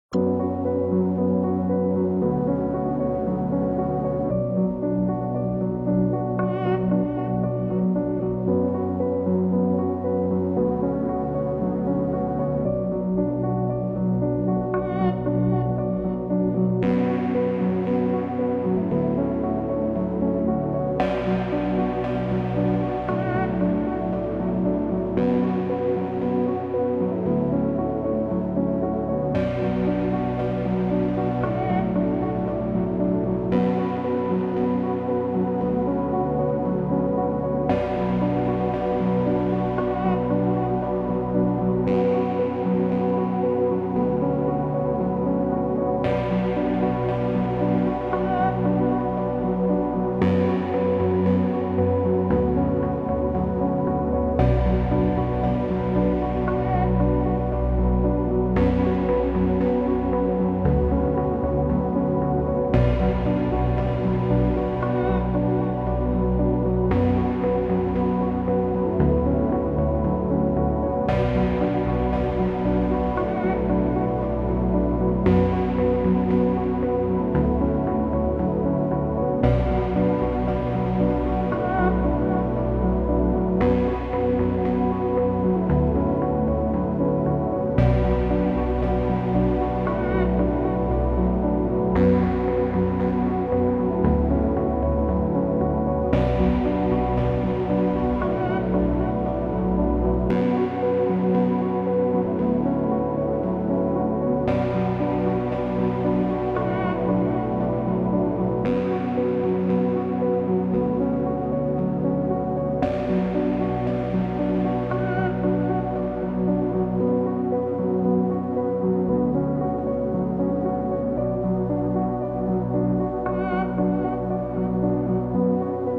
Ambience music x1.
Synths:Ableton live,Reason,Kontakt,Sylenth1,Synth1.
music, Ambience, sci-fi, electronic, piano, dark, fx, cinematic, synth, atmosphere, processed, pad, original